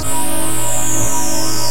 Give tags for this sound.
broken experimental glitchy industrial noise

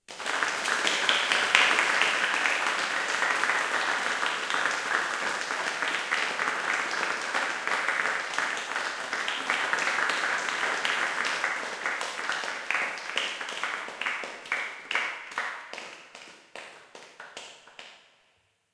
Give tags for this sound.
applause audience cheer clap clapping crowd fast group

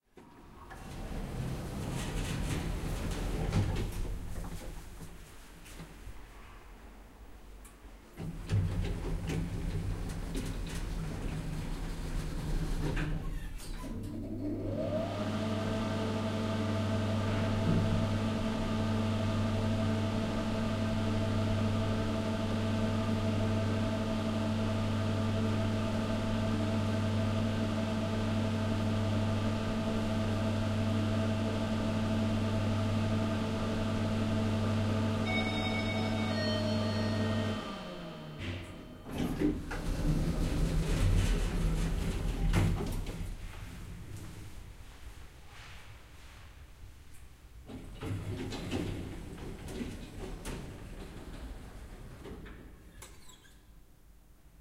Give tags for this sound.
lift fieldrecording building pozna elevator

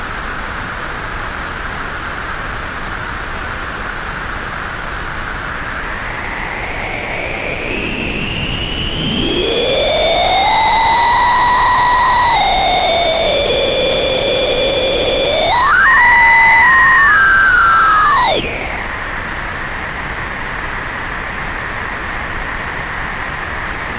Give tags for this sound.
arp synth arp2600 hardware electronic noise analog sound

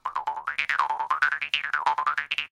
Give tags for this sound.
boing bounce doing funny harp jaw silly twang

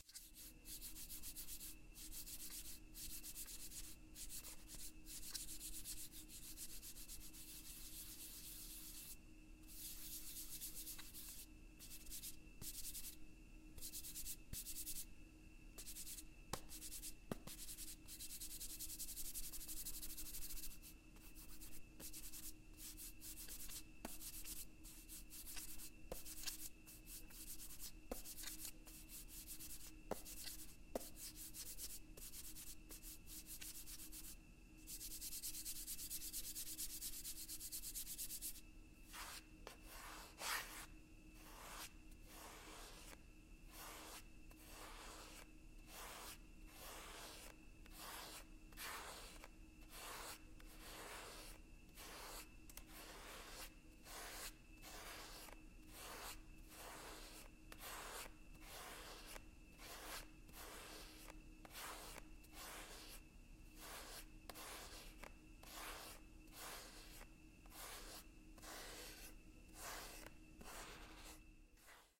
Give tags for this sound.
dj electronica hip-hop music party pop scratching sounds wannabe

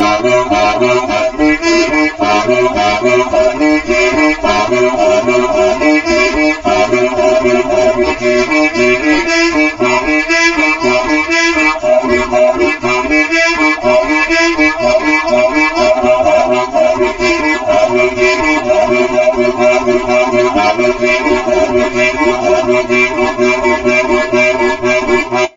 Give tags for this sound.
melody small composing Music

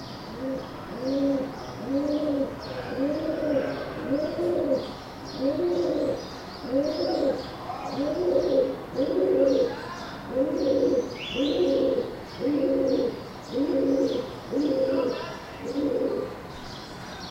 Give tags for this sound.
pigeon spring

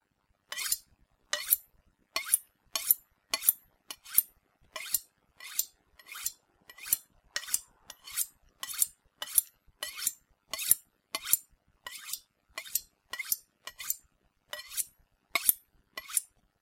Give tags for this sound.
Sharpen Butchery Horror Weapon Knife

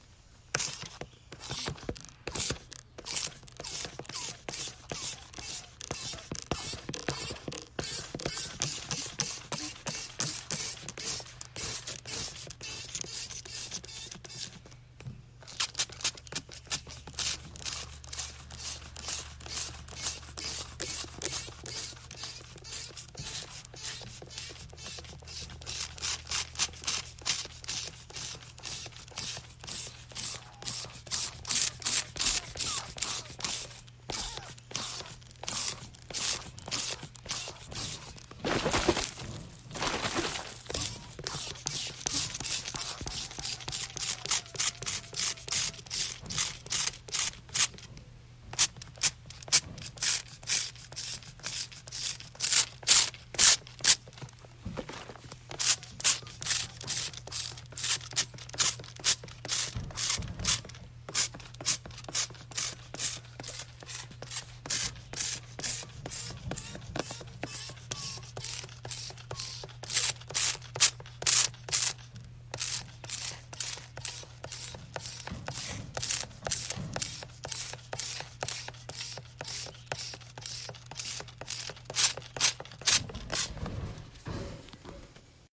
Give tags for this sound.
spray spritz squeak squirt water

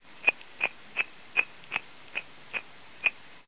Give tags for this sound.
underwater tadpole

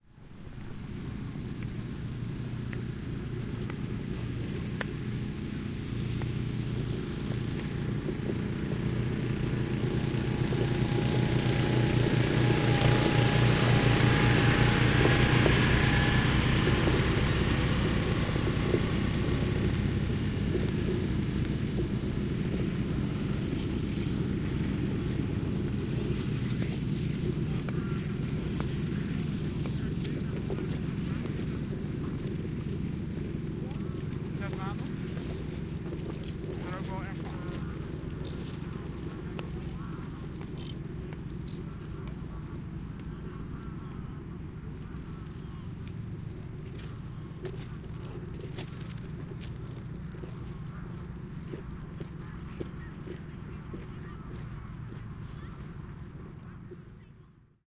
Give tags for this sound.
snow ice vehicle goose cleaning iceskating sweeping icy motorized skaters clearing

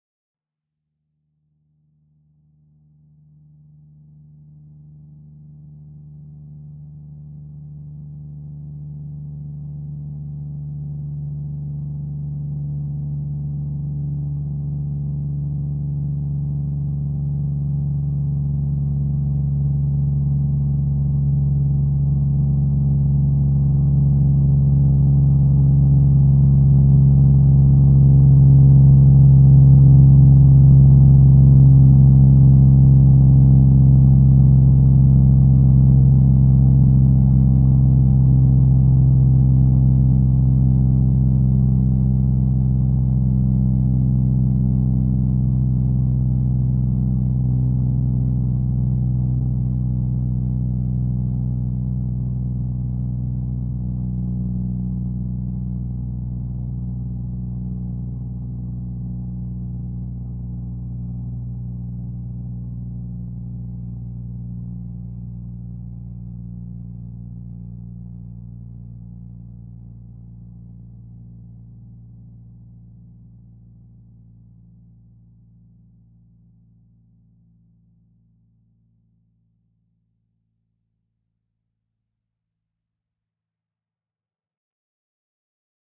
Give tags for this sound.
airplane fly over propeller aircraft fan

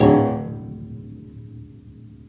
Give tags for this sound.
hit piano string unprocessed

